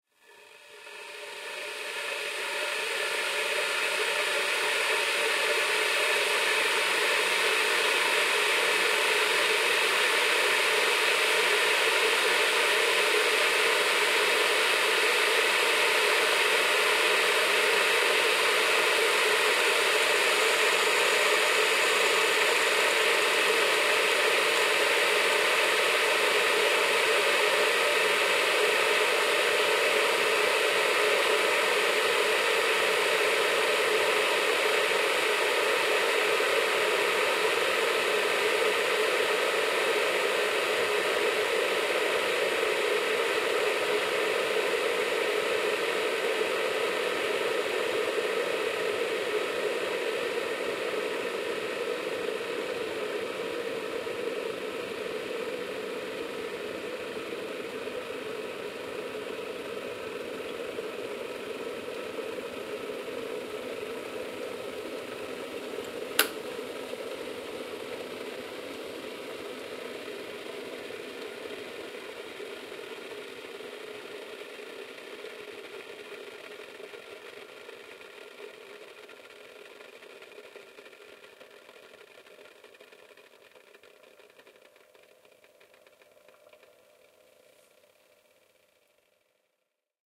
Recording of an electric kettle boiling water in the kitchen counter of my apartment.
Processing: Gain-staging and soft high and low frequency filtering. No EQ boost or cuts anywhere else.
Appliance, Boiler, Boiling, Bubbles, Cooking, Kettle, Kitchen, Machine, Mechanical, Noise, Sound, Stereo, Water